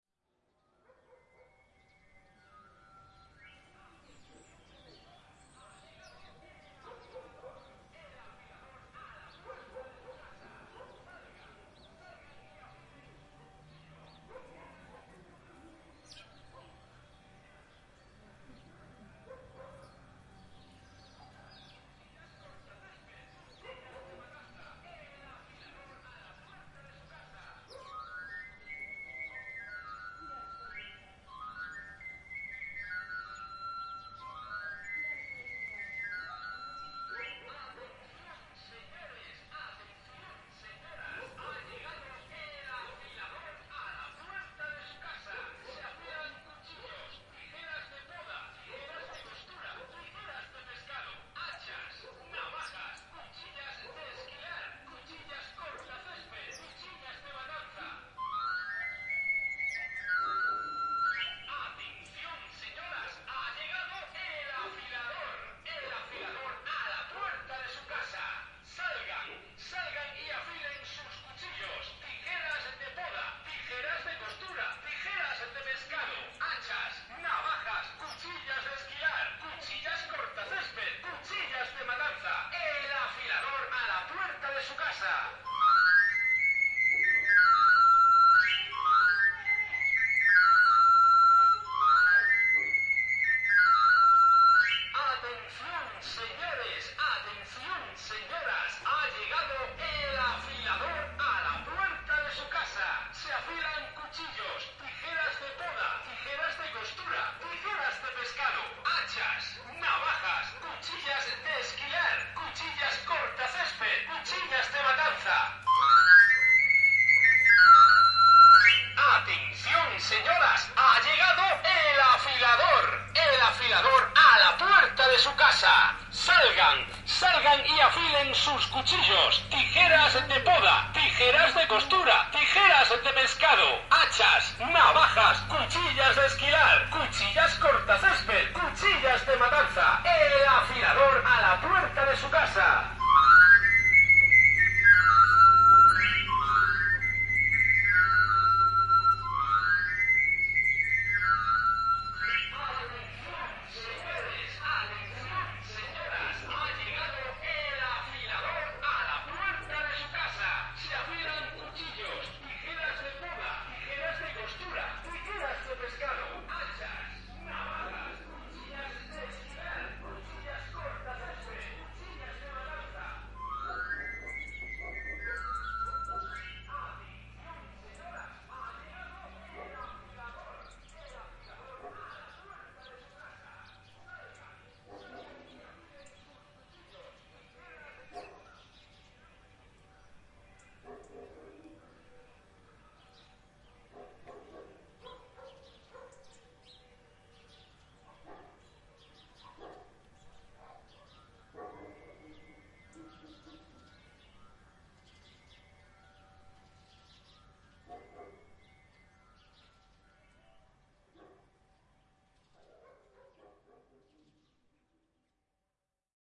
A "afiador" ((*) knife grinder, cutler man) crosses the village with loudspeakers sounding the traditional whistle.